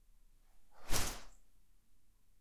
Swishes 3 gentle Double
The flexible spiral hose made for some nice and slower swishes; Some of the 16 sounds build up a little, some are very fast and strong, some soft and gentle. A whole pack for you to use.
Recorded with a tascam DR-05 stereo (the movement always went from left to right); a little noise reduction in Audacity.
air, editing, foley, luft, sound, swish, swoosh, transition, video, wisch, woosh